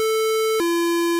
Used in my game "Spastic Polar Bear Anime Revenge"
Was synthesized in Audacity.